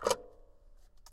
Toy records#99-K08
Complete Toy Piano samples.
Key press or release sounds.
digisample, keyboard, toypiano, studio, sample, toy